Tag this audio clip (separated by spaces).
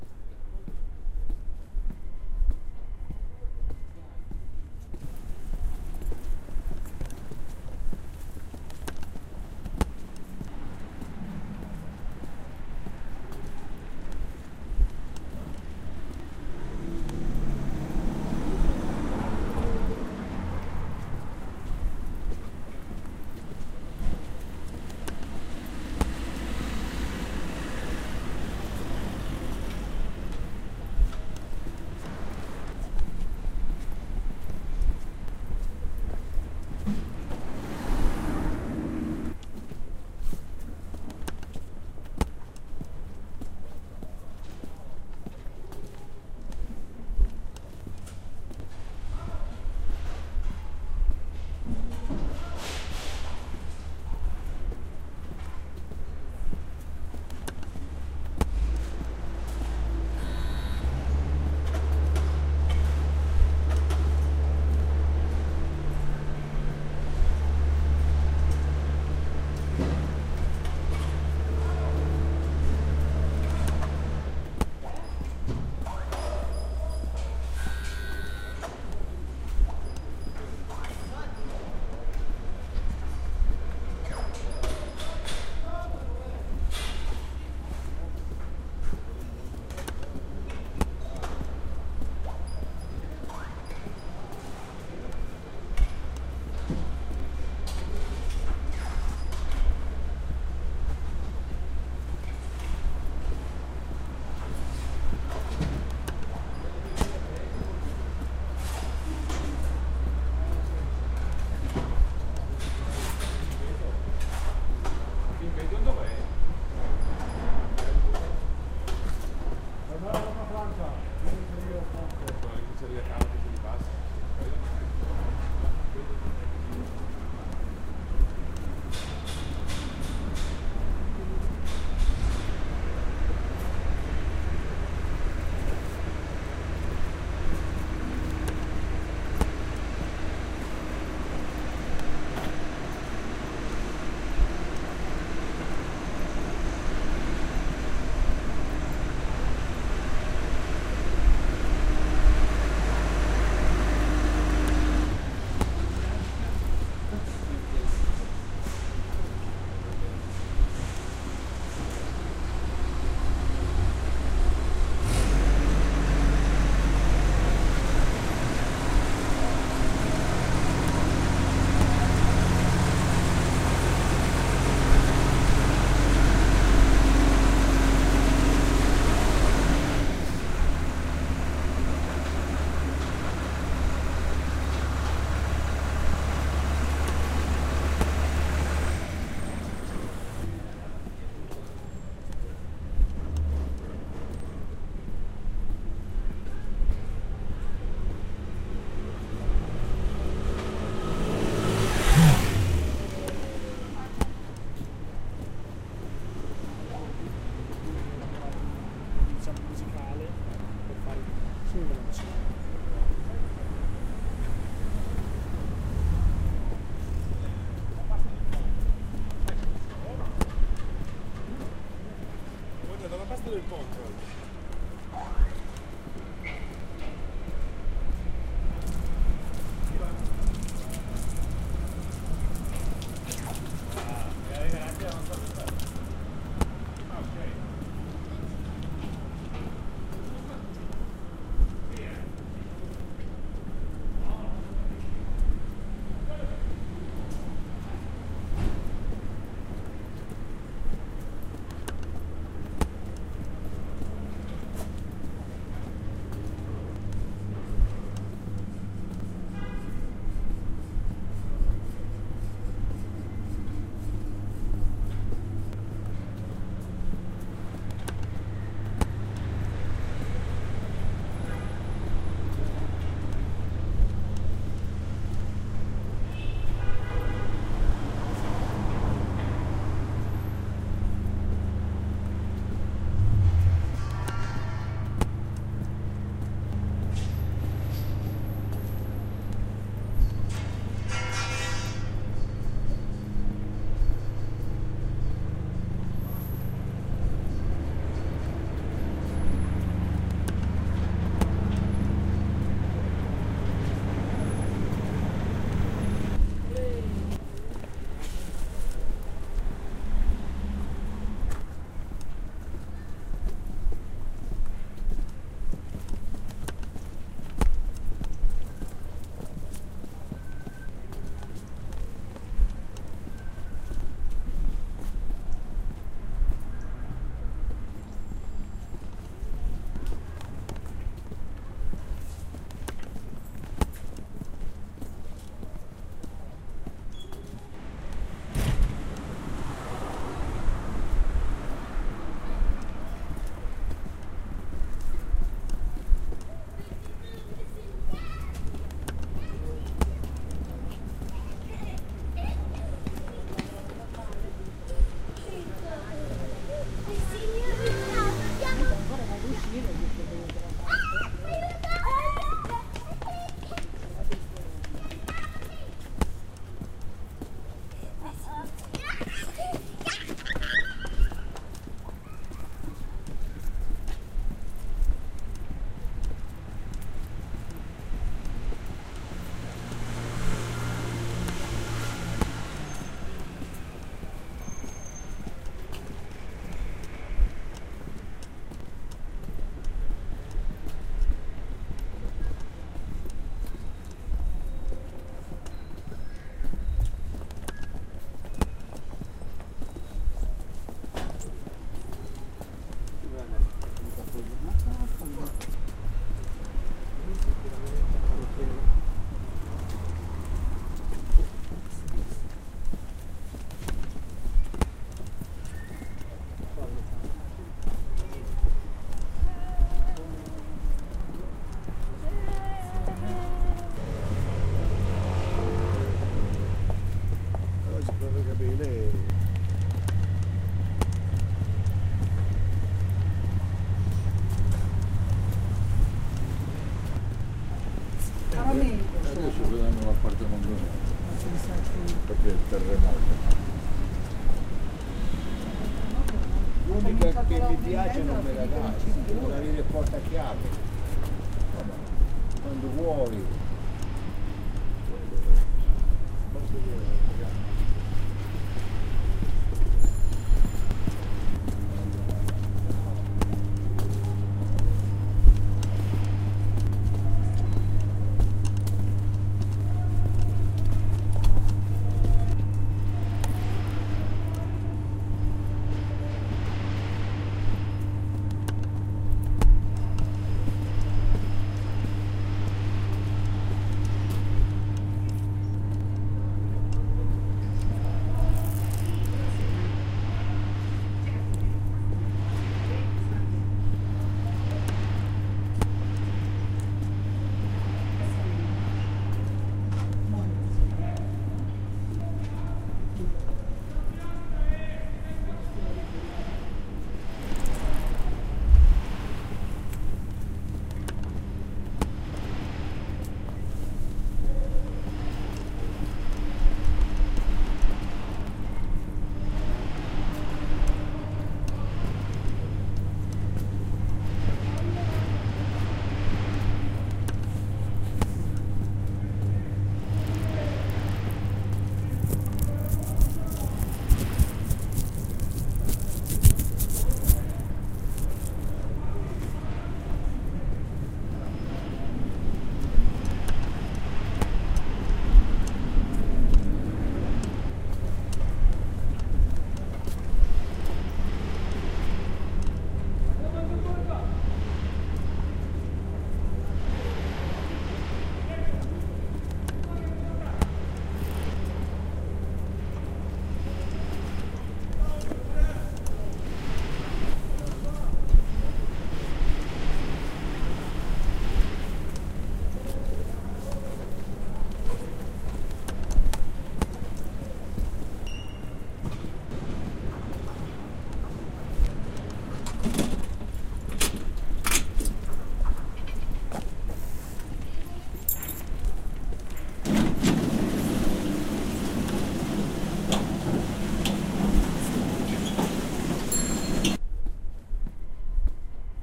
soundwalk orsi milan massobrio isola